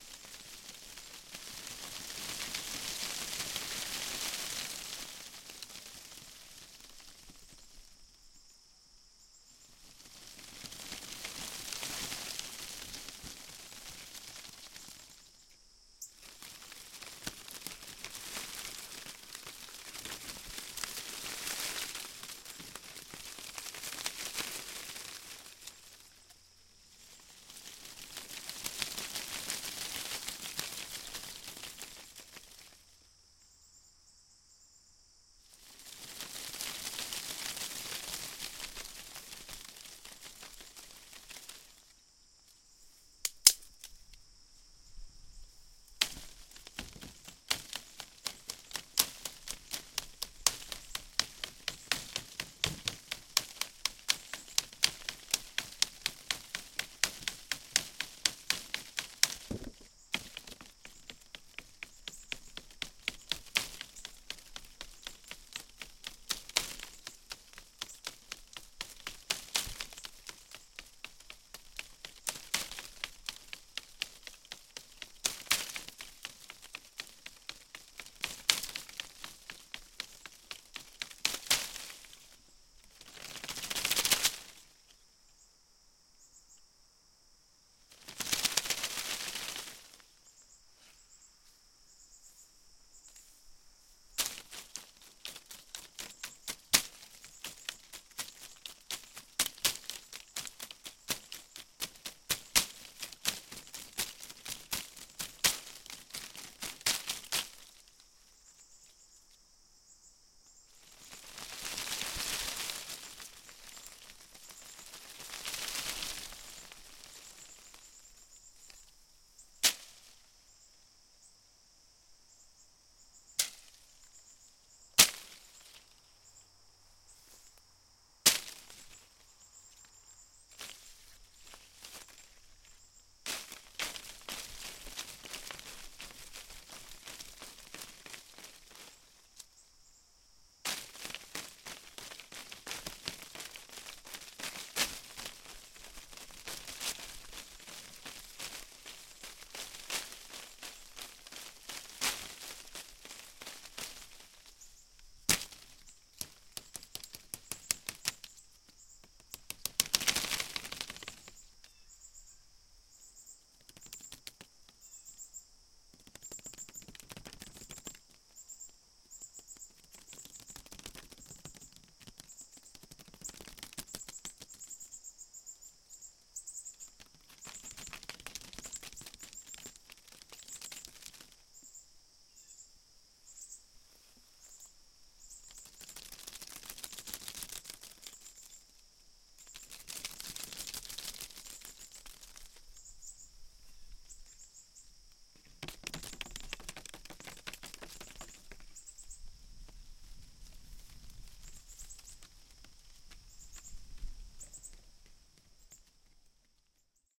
Tree, leaves, shaking, rhythms, hitting, drumming, field, summer day, NOTL, 10
Various sounds from tree branches (2010). Sony M10.
branches, leaves